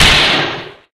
This is sound of Pistol Fire.
It is created using camera tripod that bang the floor, and edited in Audacity.
You can use this sound in any game where there is Pistol. For example, mods for Doom.
gun, pistol, shot, weapon